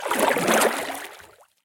Water Paddle med 015
Part of a collection of sounds of paddle strokes in the water, a series ranging from soft to heavy.
Recorded with a Zoom h4 in Okanagan, BC.
river, zoomh4, splash, water, lake, field-recording